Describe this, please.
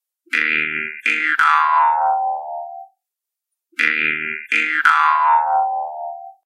sounds with Jew's harp
trump, jews-harp, wouwou, harp, jaw, ozark, juice, mouth